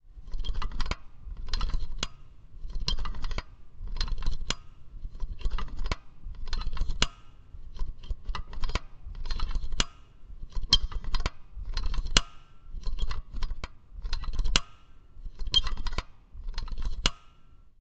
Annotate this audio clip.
For this recording, I took an old recording of the inner mechanics of an ice cream scoop, and using Logic, manipulated the pitch (down 1500 cents) using "Classic" mode. As a result of classic mode, the time of the recording changed in accordance with the pitch shift. I also normalized this file.